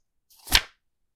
Fast Page Turn - 5
Turning a page of a book
book, books, flick, flip, flipping, library, newspaper, page, pages, paper, read, reading, swoosh, turn, turning, whoosh, woosh